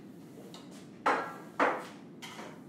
FX - golpe metalico
beat, metal